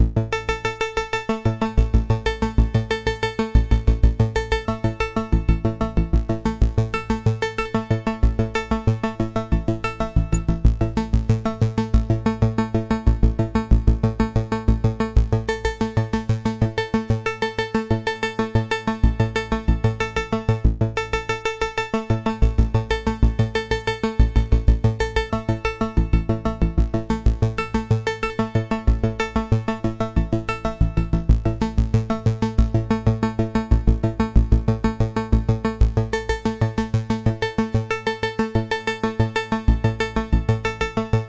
Arpeggiated Synth Delay
Microkorg preset sound, arpeggiated at 93bpm with slight delay, looped
93bpm, analog, A-note, arpeggiated, arpeggio, delay, echo, electronic, loop, microkorg, synth, synthesizer, vintage